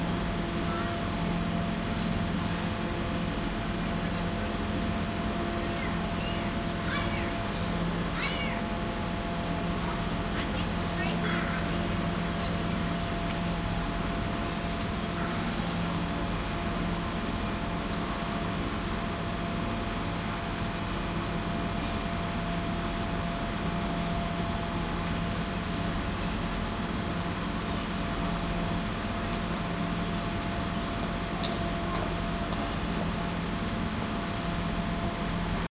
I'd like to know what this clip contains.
delaware approachingshore
Getting closer to Delaware on the Cape May-Lewes Ferry heading south recorded with DS-40 and edited in Wavosaur.